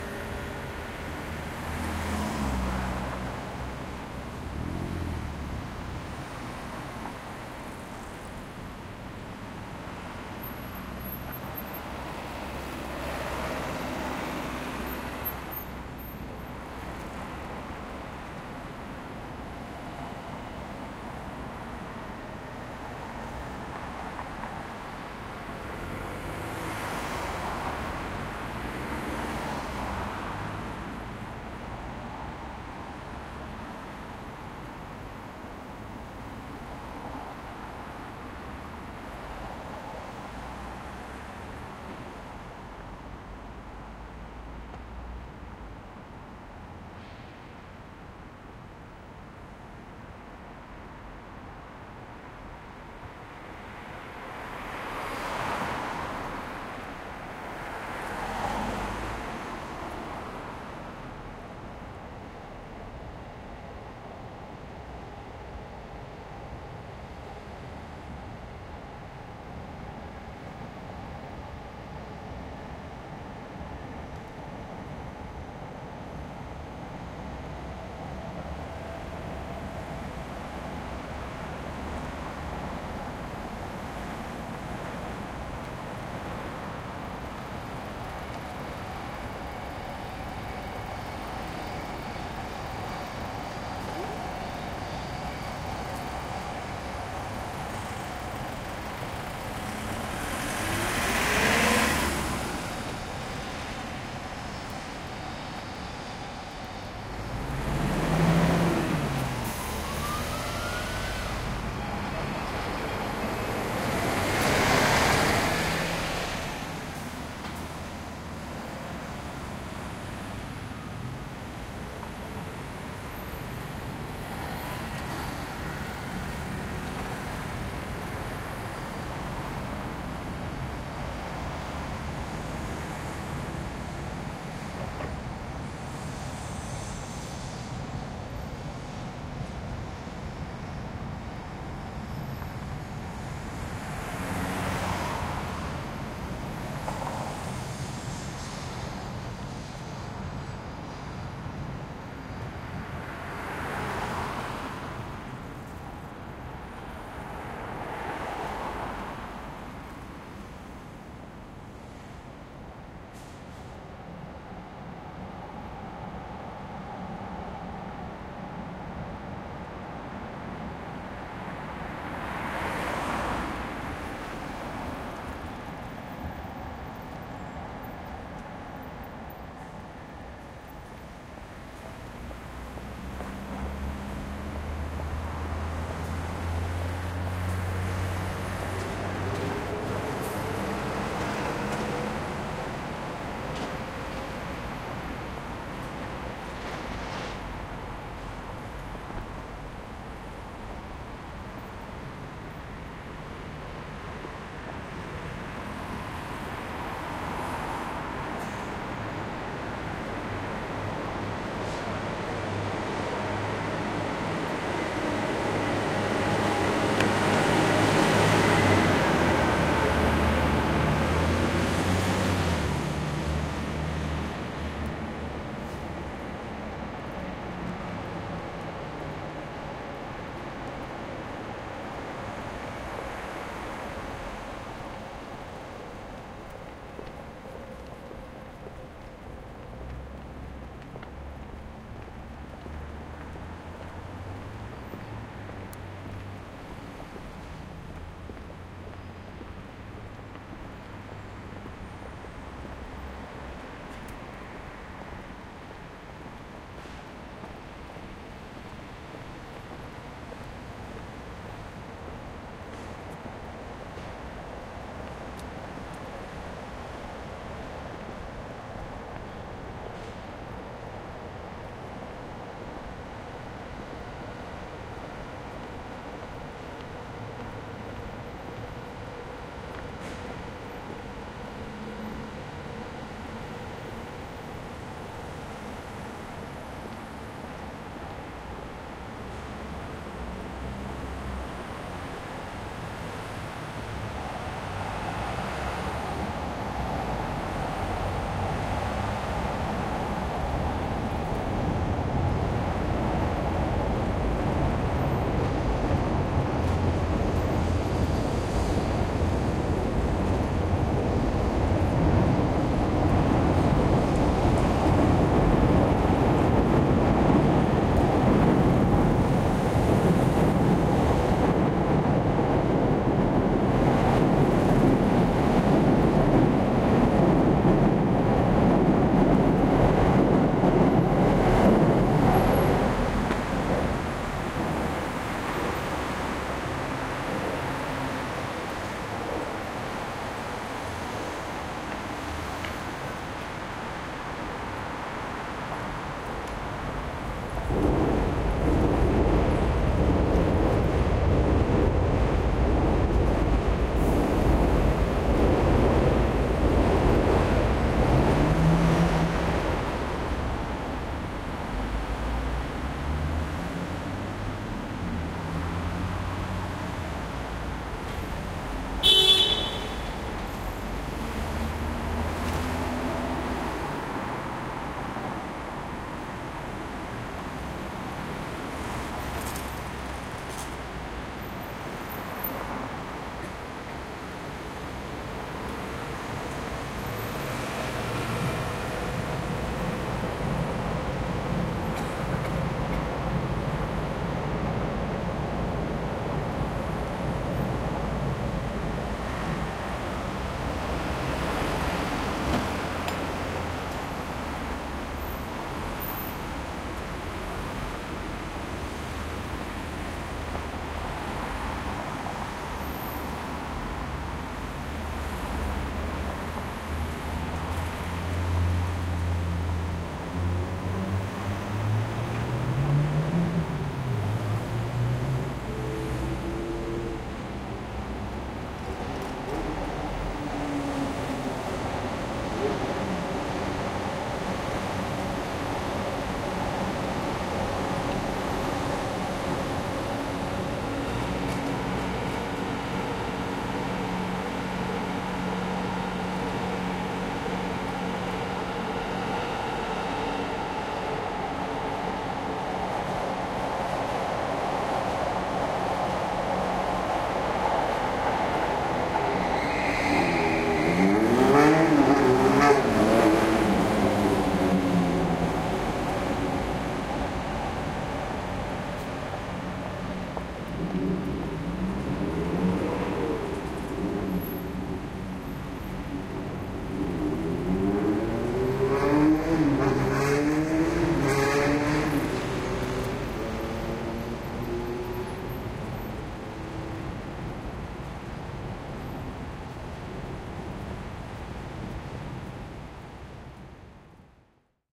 Japan Tokyo Edo-Dori Evening Walk Cars Motor Bridge Honk Screeching
One of the many field-recordings I made in Tokyo. October 2016. Most were made during evening or night time. Please browse this pack to listen to more recordings.
bike, car, cars, city, engine, field-recording, honk, honking, Japan, motor, motorbike, motors, screeching, street, tire-screech, Tokyo, traffic, walking